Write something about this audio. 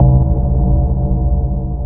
Filters are essence of life.
That sound can be used as a bass.